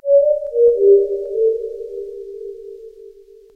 HF Jazz Instrument
A kind-of jazzy little thingy...